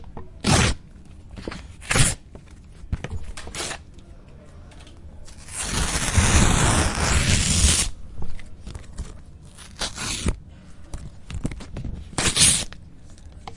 paisaje-sonoro-uem-SATISFACCION Tearingpaper-almu
El sonido de cuando rompes un papel en muchos pedazos, el sonido del papel rompiendose es muy relajante.